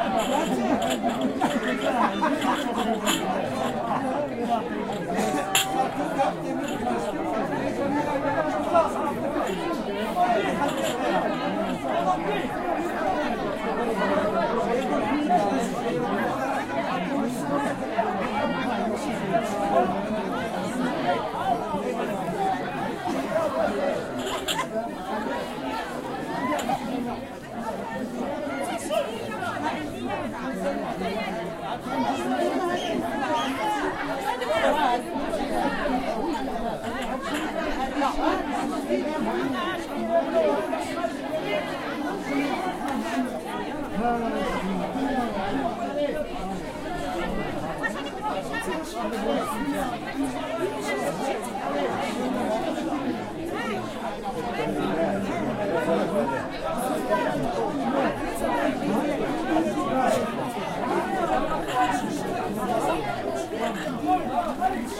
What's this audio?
Market in Meknes, Morocco